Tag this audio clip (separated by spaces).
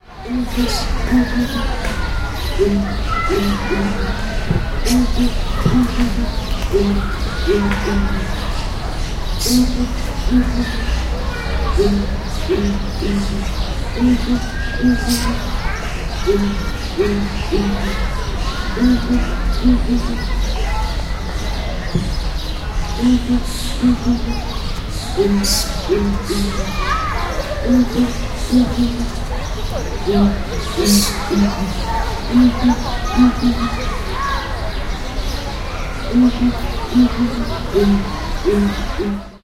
Zoo Barcelona animals Spain calao birds field-recording ZooSonor